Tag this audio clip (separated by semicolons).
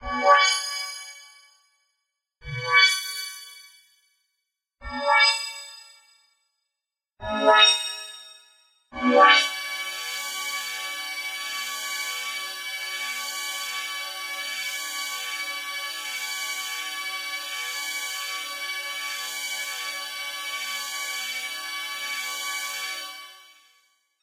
teleport; spell